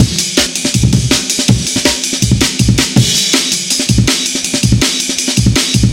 Tech-Step Break
A tech breakbeat 162bpm. programed using Reason 3.0 and Cut using Recycle 2.1.
beat, break, jungle, loop